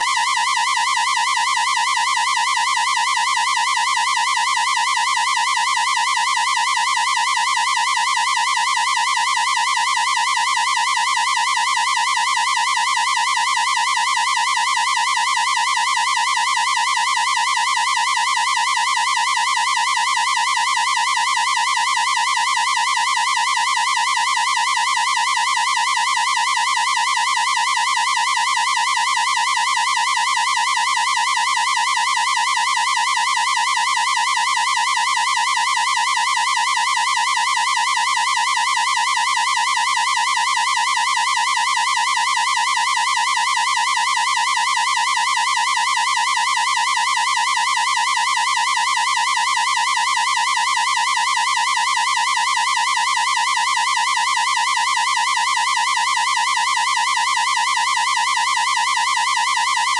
flanger alarm
Flangered tone. High-pitched and somewhat loud.